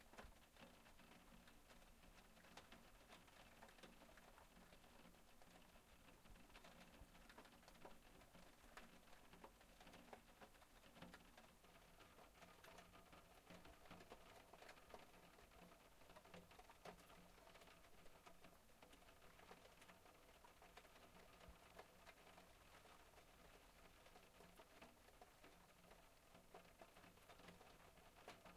rain on skylight
Heavy rain ticking on skylight or roof window. Recorded with zoom iq6.
skylight, rain, raining, rainfall, weather, field-recordingb, window, rainy